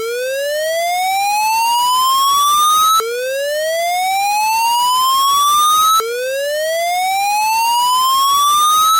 MINISCALCO Selena 2018 2019 PoliceSiren
For this sound, I chosed to create a police siren sound. So I chosed to generate a tweet and I chose a square waveform. I chose a frequency increasing from 440 Hz to 1320 Hz. As I wanted a repetitive sound, I chose that my square wave should be short to be able to repeat it dynamically (3 seconds). Finally, once my wave is over, I simply create a repetition of it.
Description - Typologie de Schaeffer
Masse : Son cannelé
Timbre: Acide
Grain : Son lisse
Allure : Pas de vibrato
Dynamique : Début du son assez violent, le son en lui-même est graduel
Profil mélodique: Variation serpentine
Profil de masse : un son avec une seule note mais plusieurs hauteurs qui montent dans les aigus